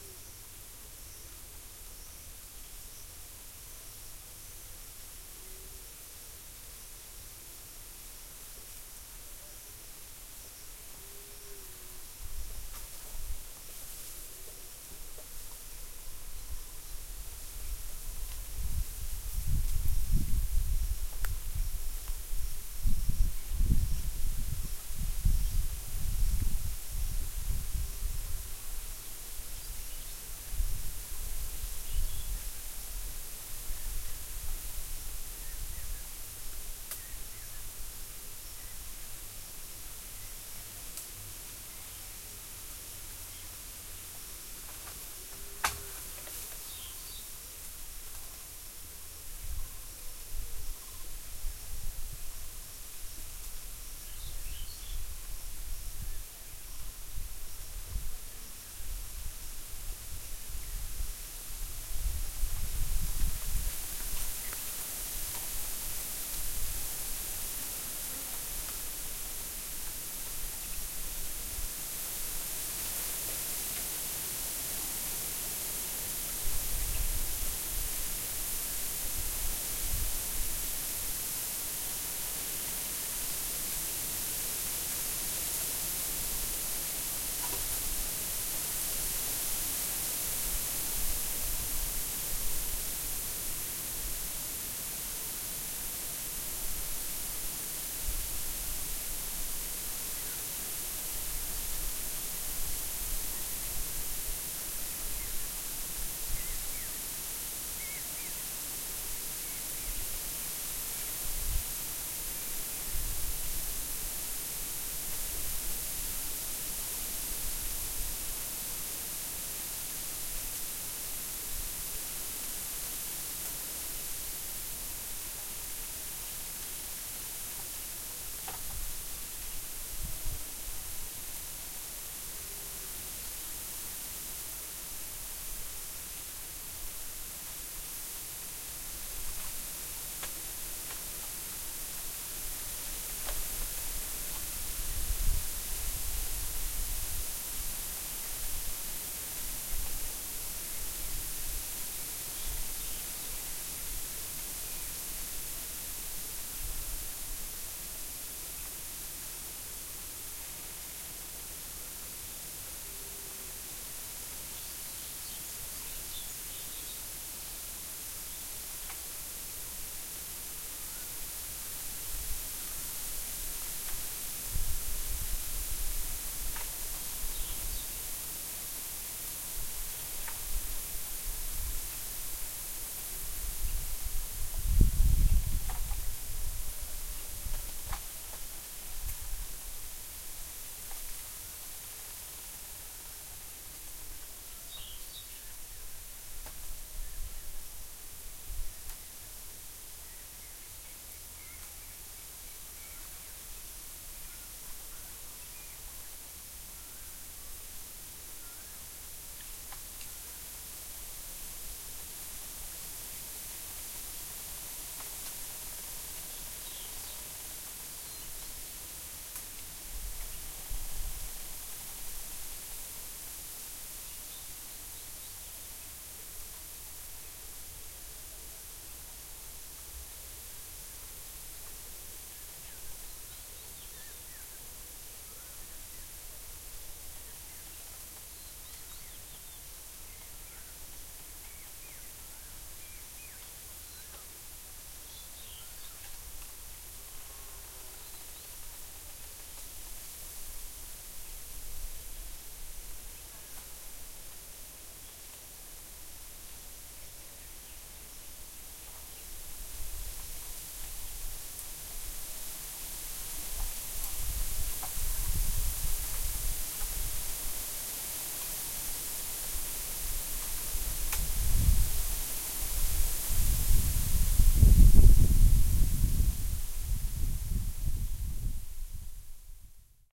Wind in the bamboo grove